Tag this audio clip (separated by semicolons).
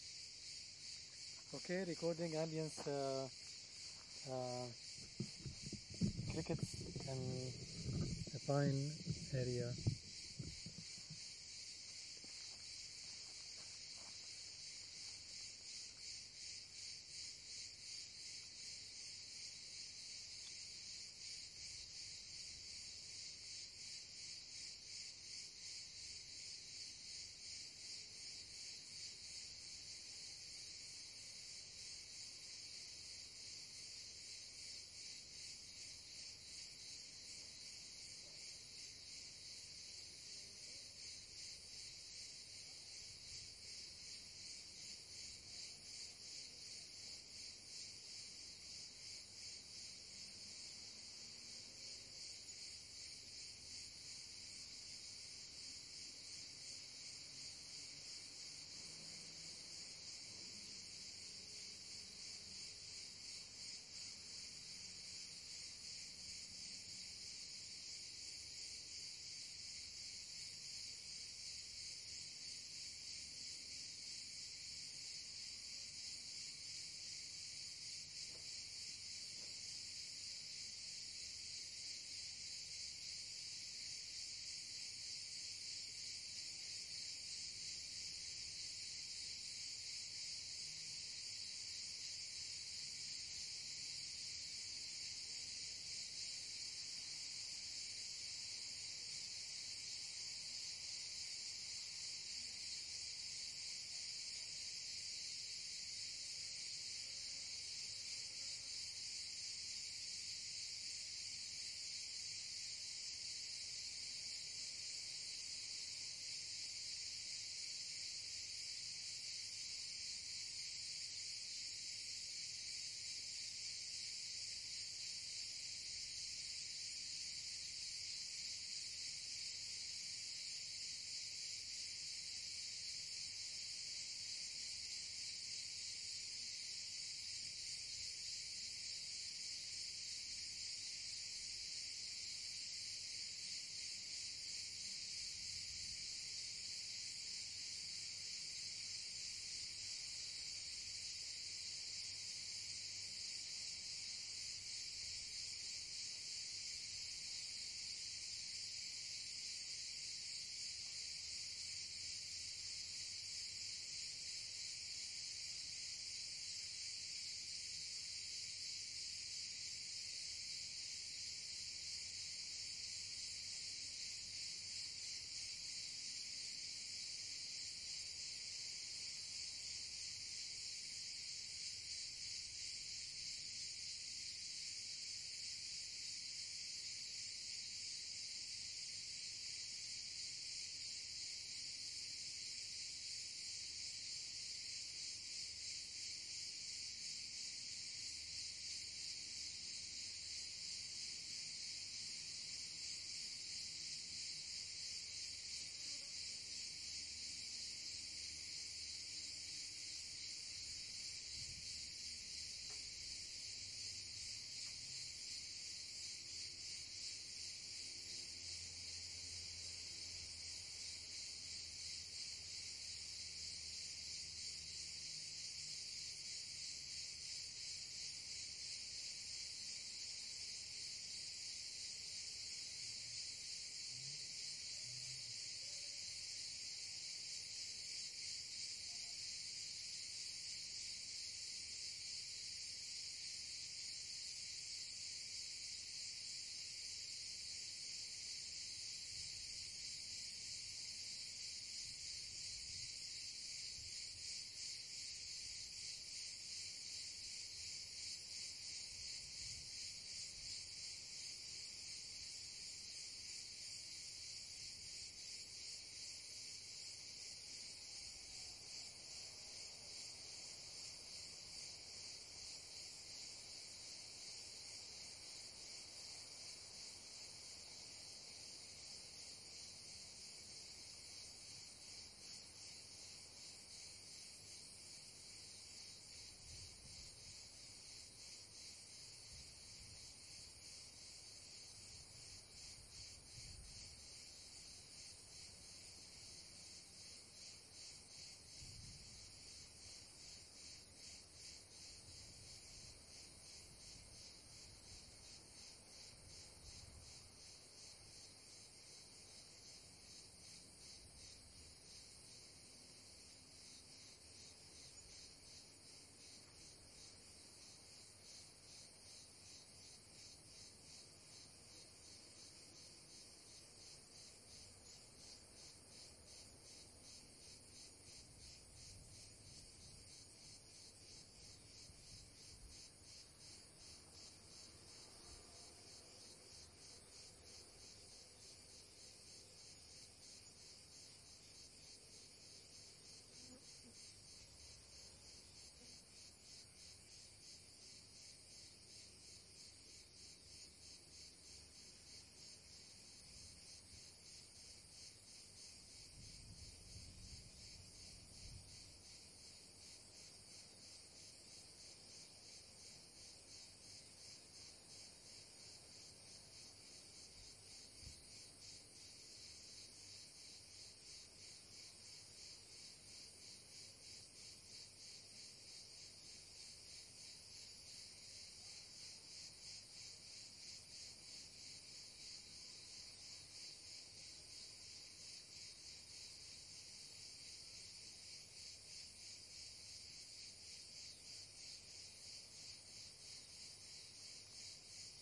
summer; urban; cricket; Heavy; rural; chirping; nature